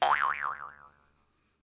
jaw harp19
Jaw harp sound
Recorded using an SM58, Tascam US-1641 and Logic Pro
silly, boing, funny, jaw, twang, harp, bounce, doing